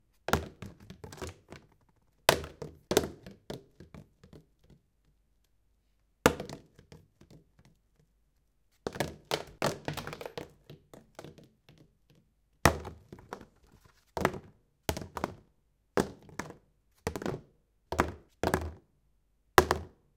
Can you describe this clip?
Dropping a plastic bicycle splatter guard on the floor.
Recorded with Zoom H2. Edited with Audacity.